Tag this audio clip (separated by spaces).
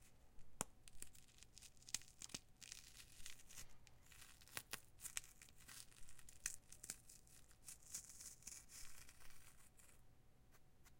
cracker crumble gram